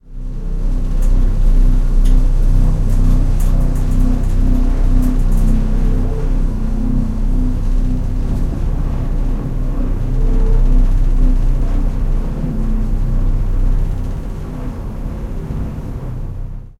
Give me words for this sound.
elevator noise
elevator, mechanic, noise